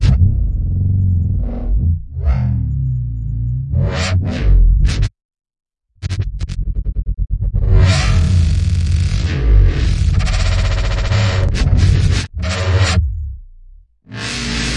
granular synthesizer ink
This is a processed waveform of a bassloop. I made it with fruity loops granulizer. Enjoy :)